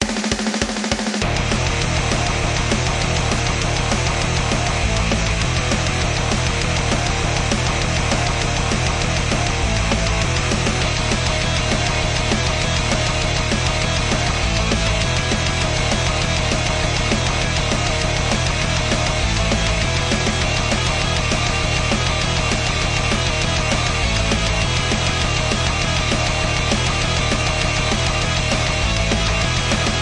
Metal 2-Step Intro
bass, drums, guitar, intro, rock